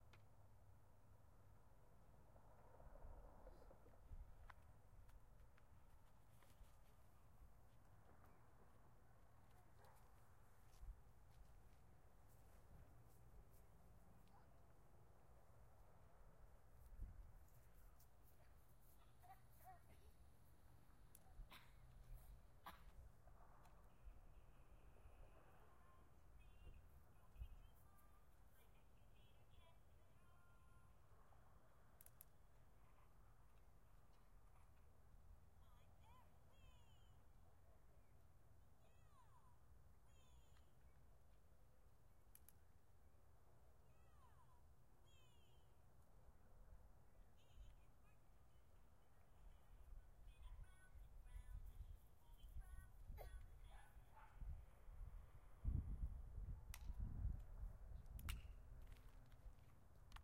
Backyard in OK
My backyard in Norman, OK.